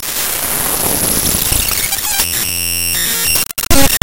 Raw import of a non-audio binary file made with Audacity in Ubuntu Studio
file, noise, raw, electronic, data, glitchy, distortion, computer, glitch, binary, extreme, loud, digital, random, harsh, glitches